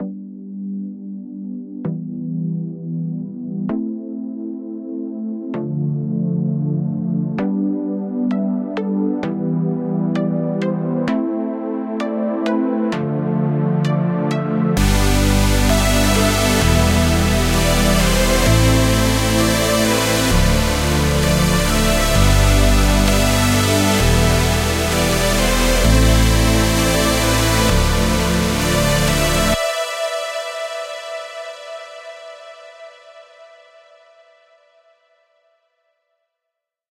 Intro for a song, dance, electro style.
Made with FL Studio, Sylenth1 VST and more